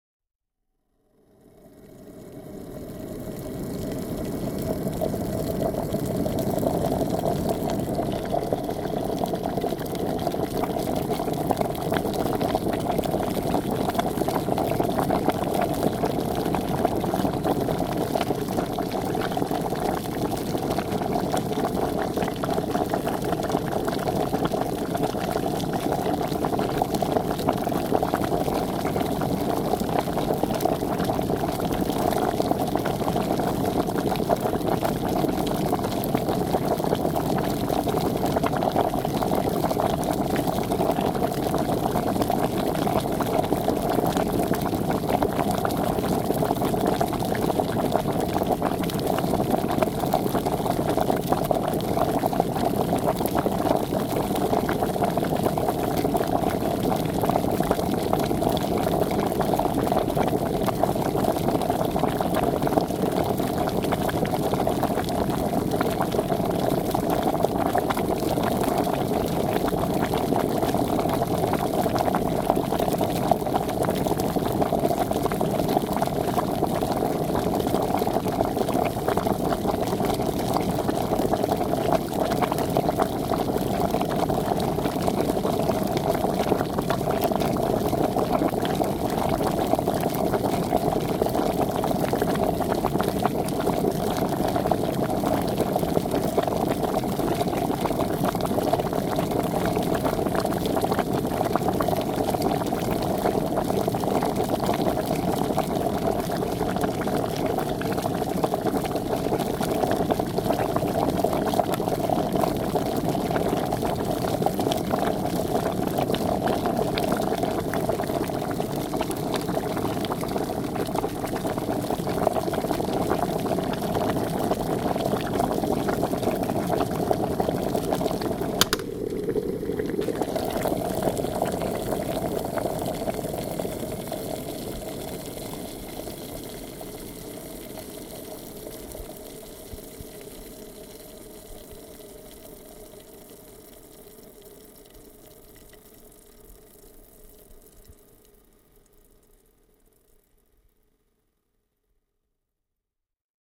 Household - Kitchen - Water Boiling
close up recording of boiling water on an electric hob.
cook, kitchen, water-boiling, water, pot, cooking, stove, kettle, pan, boil, boiling